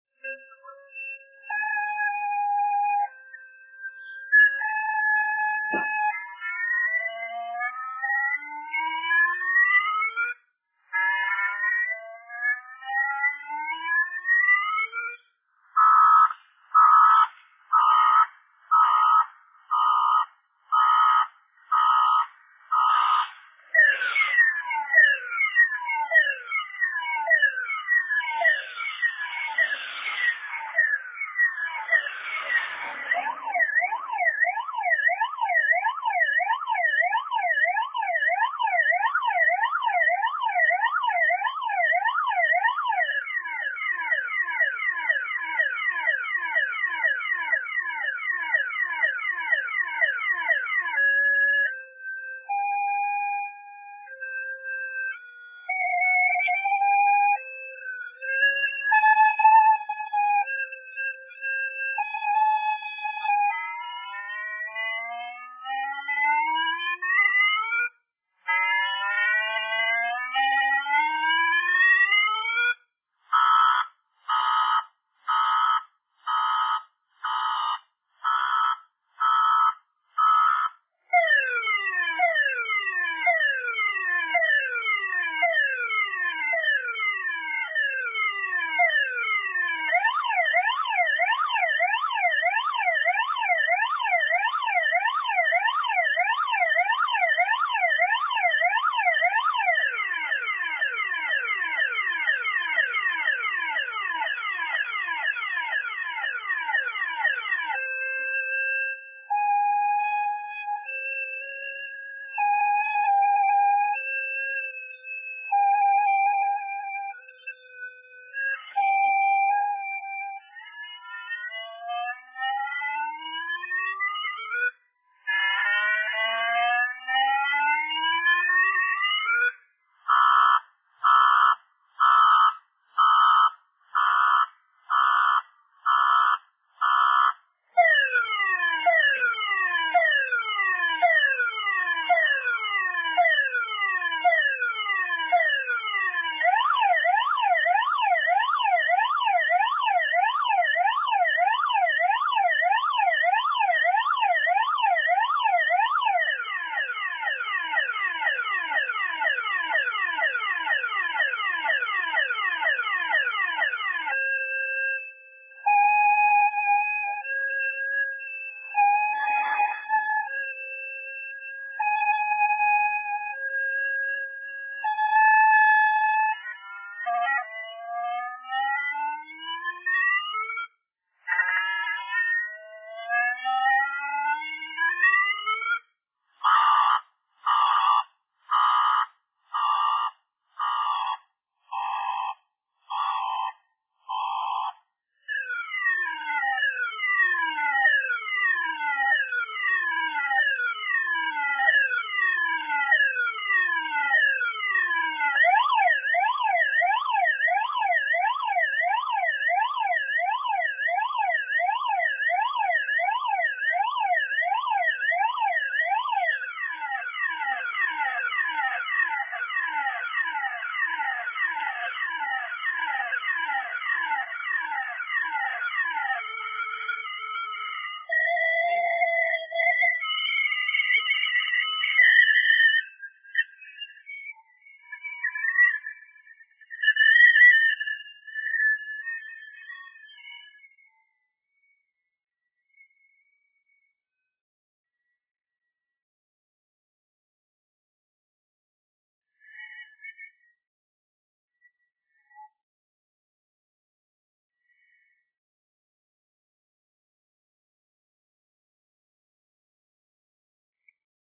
car alarm dying out
a car alarm slowly dying out as the battery dies
alarm, battery, car, dead, mono, weird